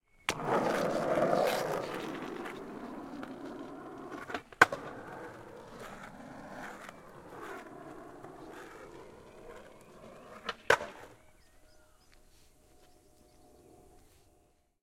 Long board stake, hard wheels. Recorded with a Rode NT4 on a SoundDevices 702